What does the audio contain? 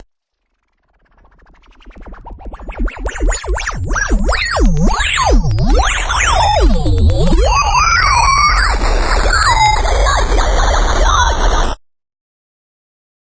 RISERS 25 165-8 with tail
Analog Seqencing and Digital Samples
background, soundscape, virtual